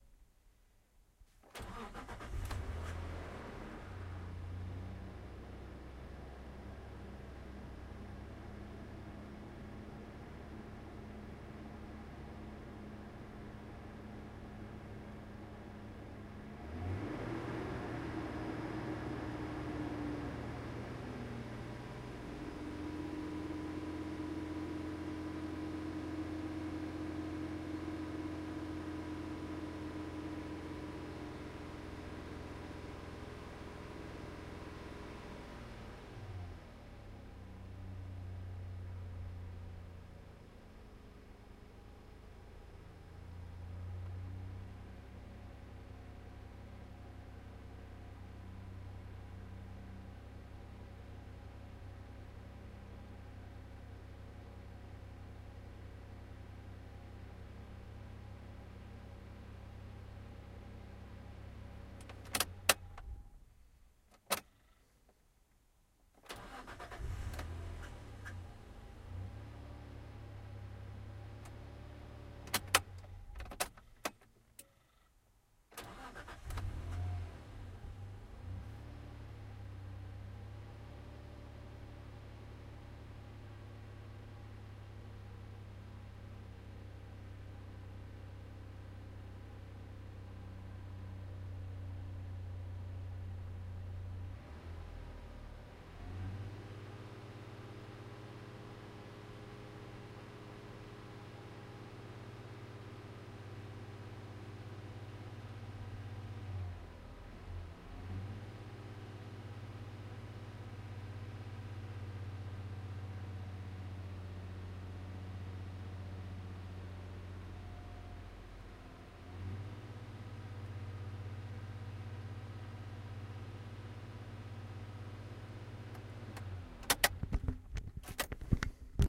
Suzuki Vitara V6 engine recorded from the drivers position with Zoom H1.
This includes starting, running, revving, idling, switching off, three times.
(The car is stationary throughout)